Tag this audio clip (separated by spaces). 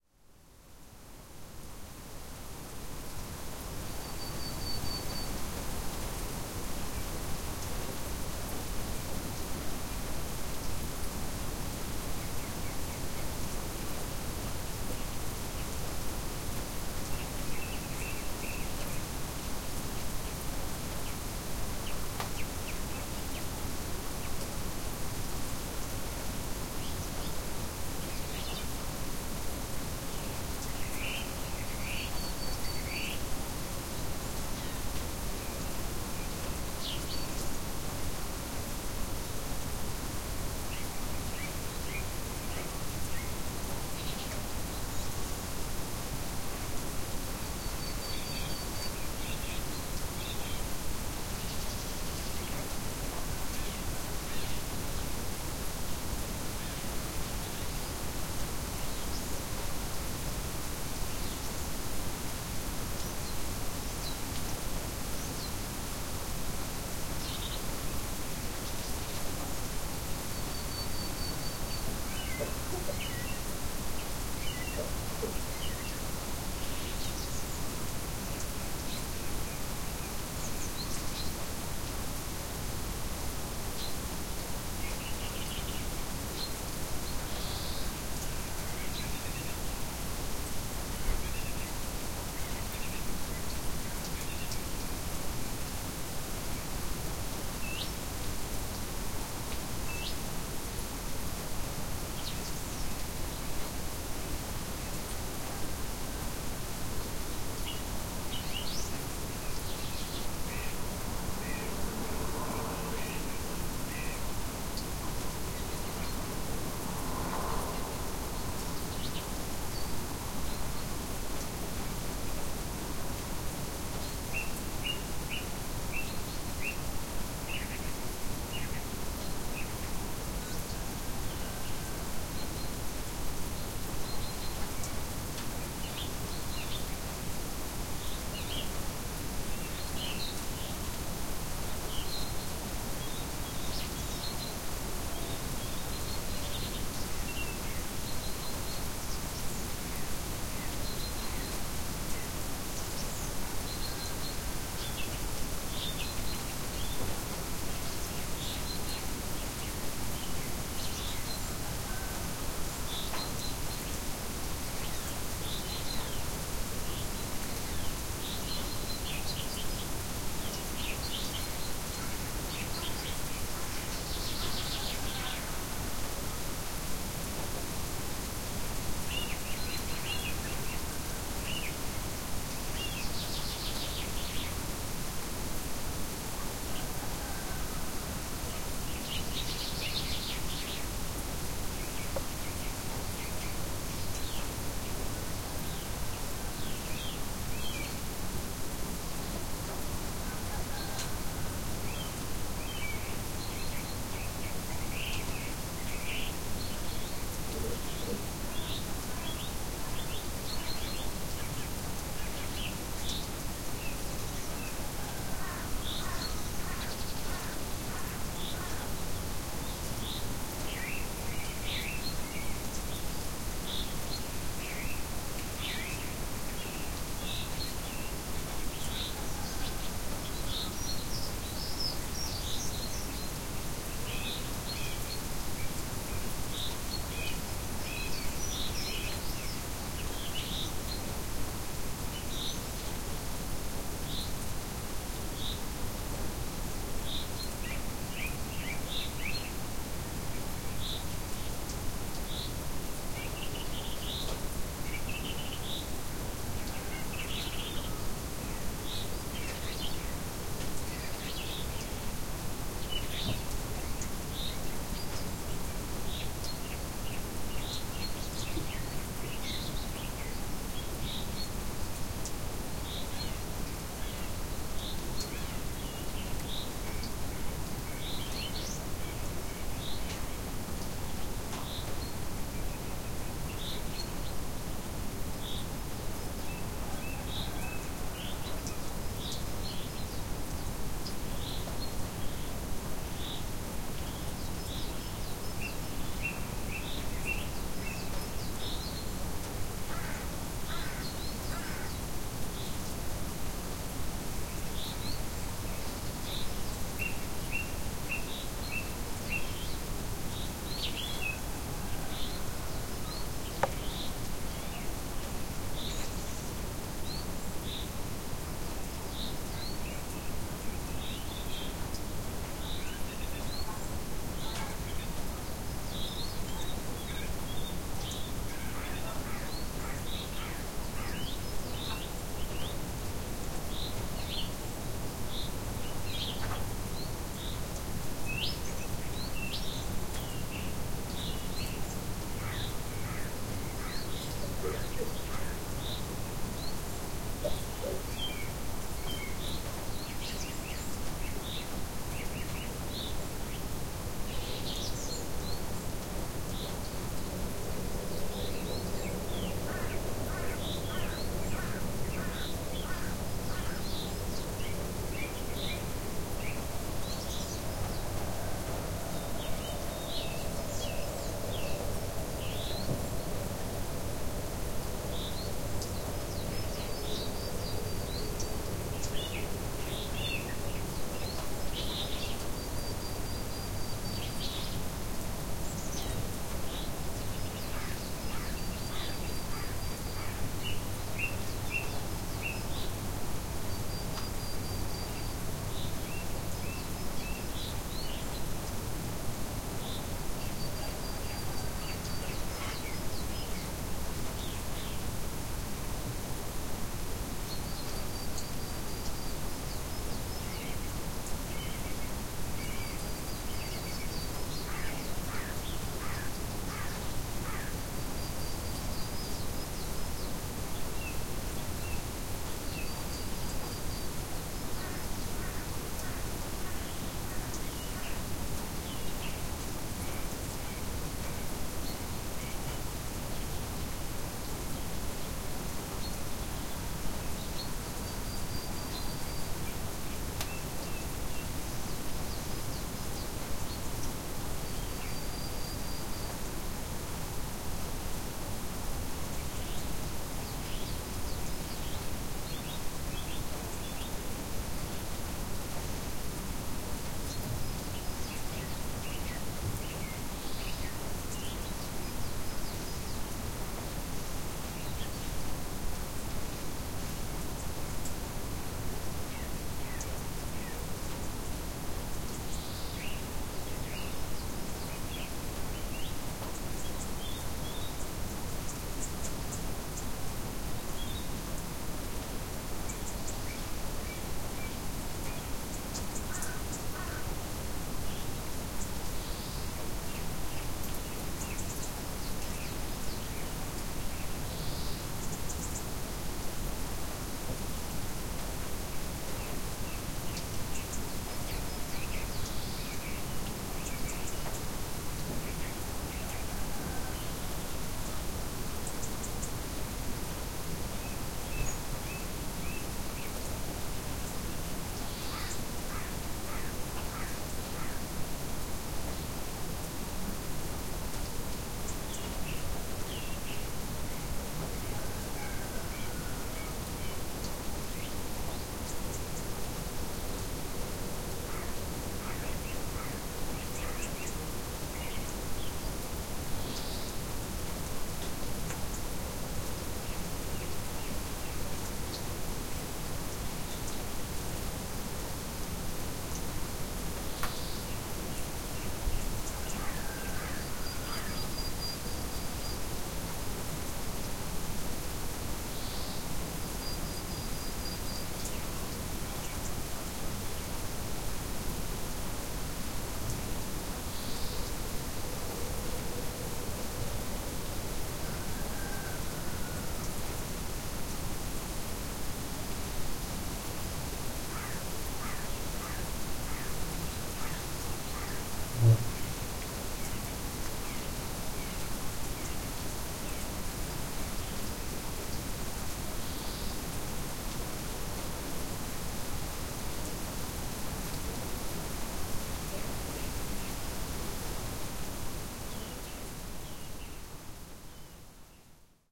ambiente birds chirping field-recording mountains rain snow spring twitter